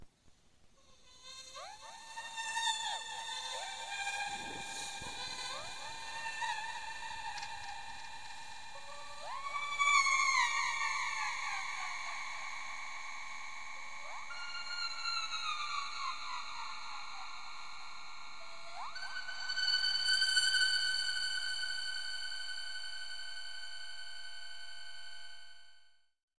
fates1 eerie
Eerie (bad :D) singing recorded with reverb and highered pitch.